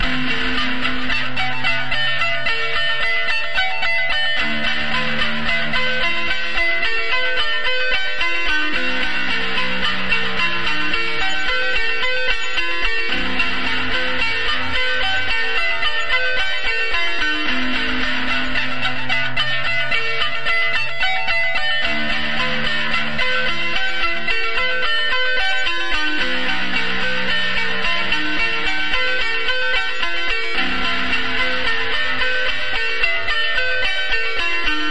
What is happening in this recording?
guitar 2 hi 16bar
Ableton guitar loop3
delay, distortion, guitar, loop